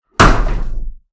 Wood Impact 3 {Hit by axe}
This is the sound of me hitting a hardwood pallet with a tomahawk.
Don't worry, the pallet was thrown out by lobstermen and stank of bait. I would never hurt a law-abiding pallet!
axe, bad-lumberjack, cut, development, field-recording, game, games, gaming, hit, impact, wood